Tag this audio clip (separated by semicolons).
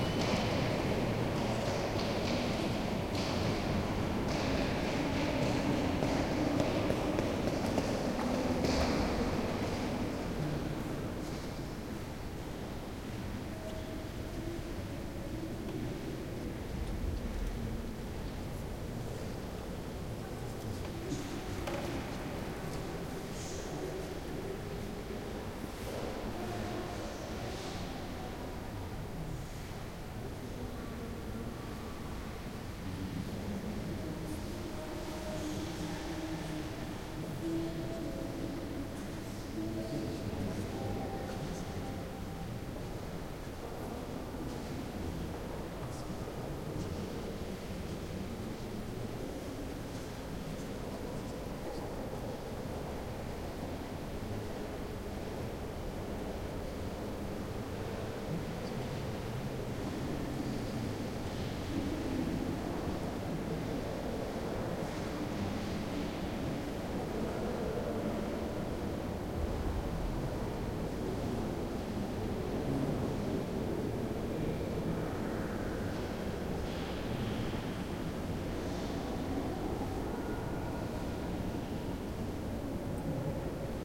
Field-Recording; Germany; South